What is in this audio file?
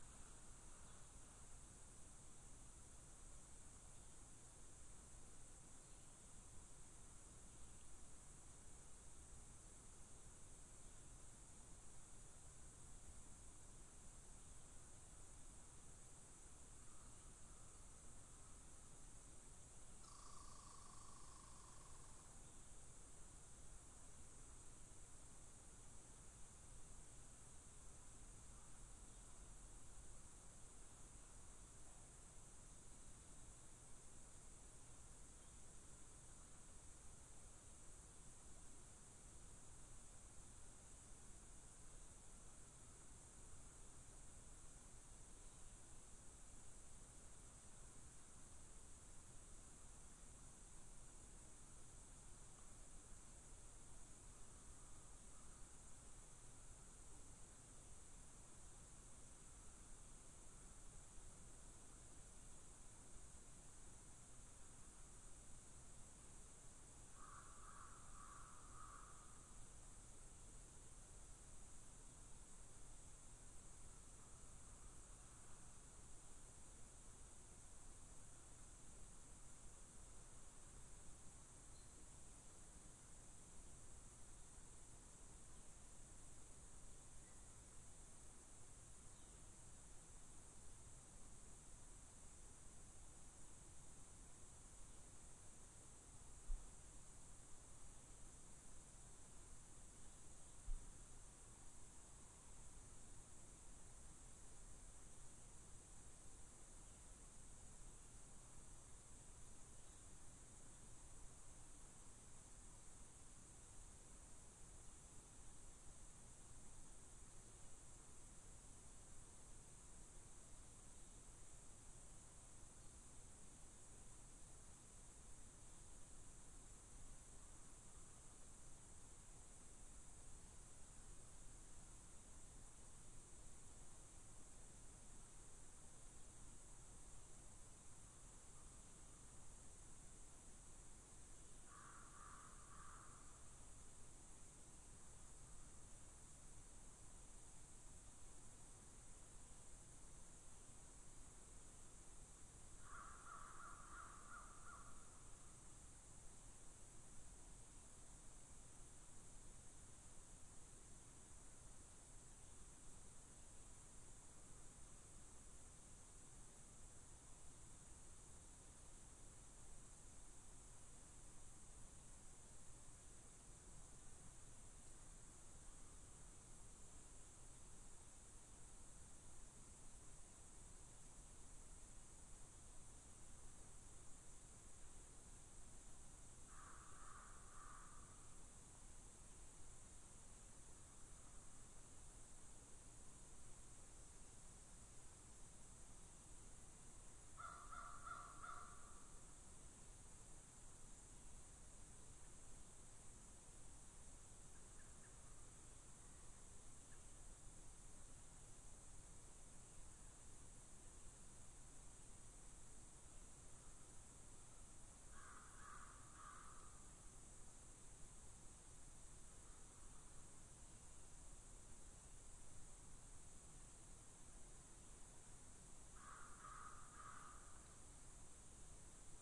Forest, closer to silence. This sample has been edited to reduce or eliminate all other sounds than what the sample name suggests.
field-recording, forest, quiet